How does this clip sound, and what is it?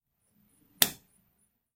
hit on head with hand
me mistreating my head
hand, head, punch, spank